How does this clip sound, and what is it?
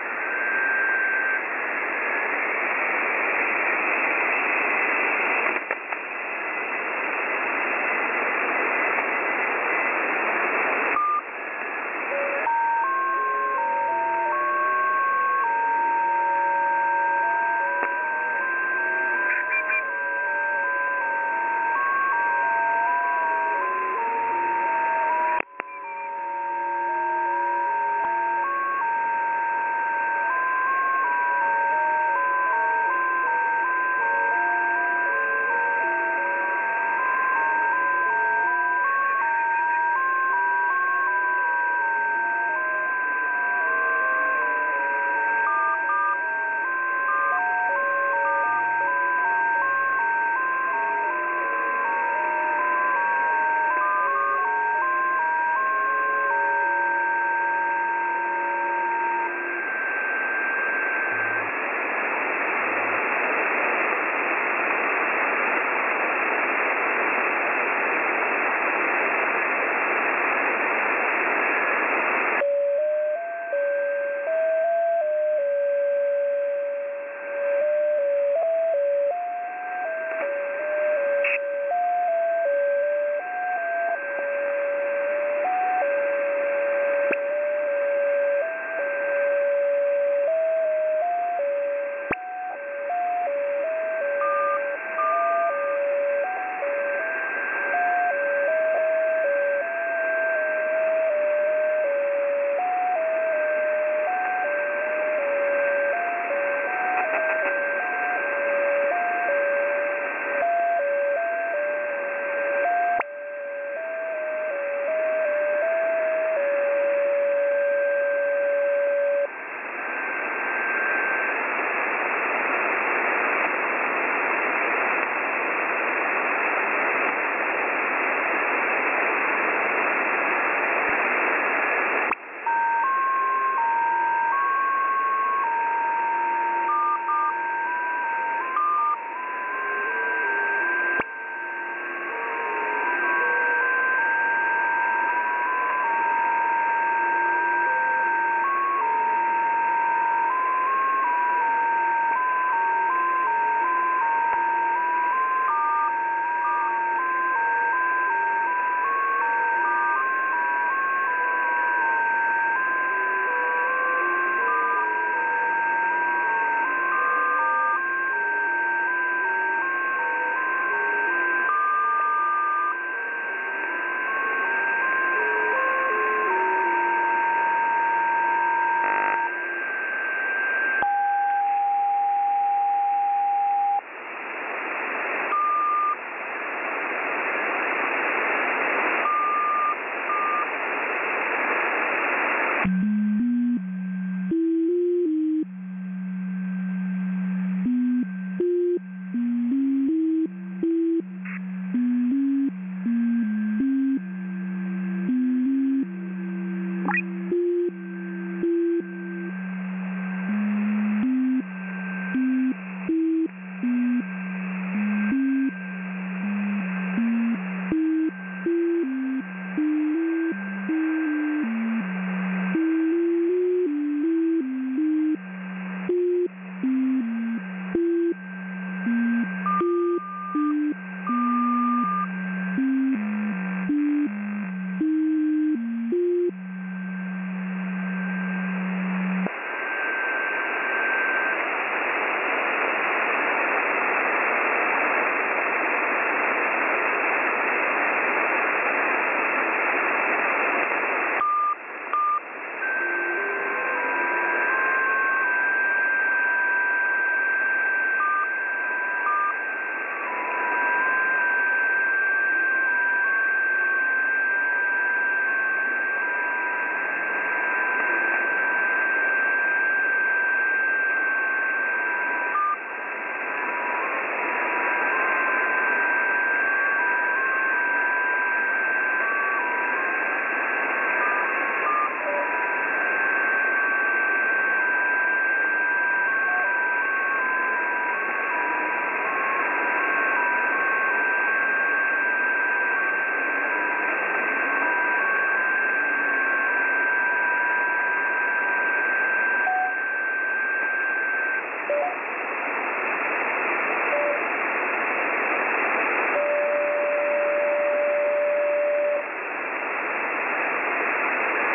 Five separate melodies on 14077, also shows the short break of static between each transmission. This recording is slighly interfered by various static noises and little bleeps and blips. No idea what it is. Recorded on the website.
Its broadcasts are musical-sounding tones, it could be a 'numbers station' without voices but this question presently remains unanswered.